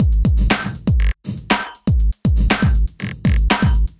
2 measures 120bpm 4/4